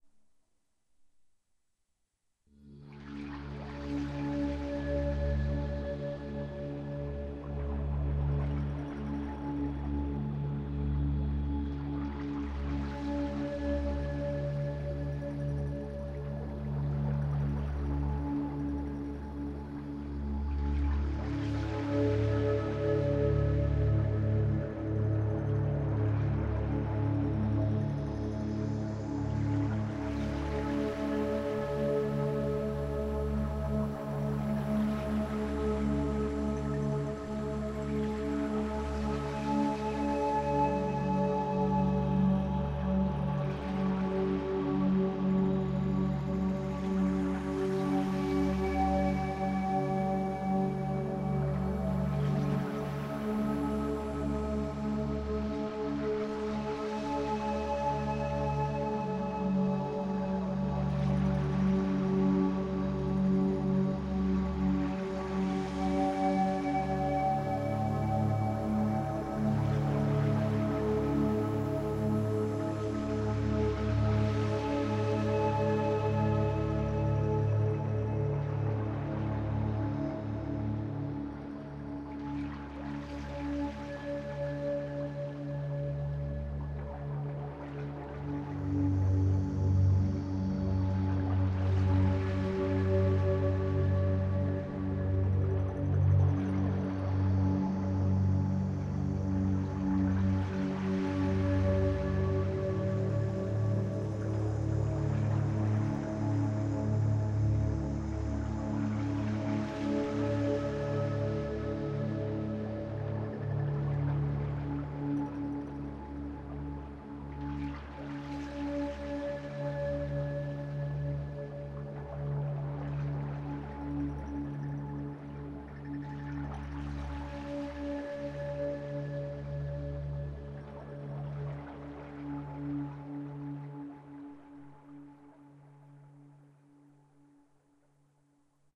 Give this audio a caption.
relaxation music #2
Relaxation Music for multiple purposes created by using a synthesizer and recorded with Magix studio.
Edit: My first sound that has 1000 downloads! Thank you everyone!